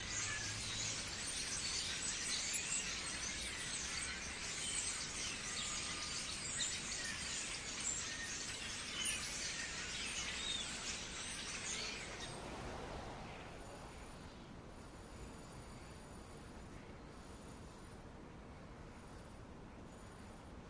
BI STARLING WAVE-01
a murmuration of Starlings (flock of birds) takes flight from a tree.
Recorded 2012 Toronto DR100 - 500 starlings babbling in a tree silmultaneously take flight. Very subtle whoosh as the one brain flock falls silent and exits.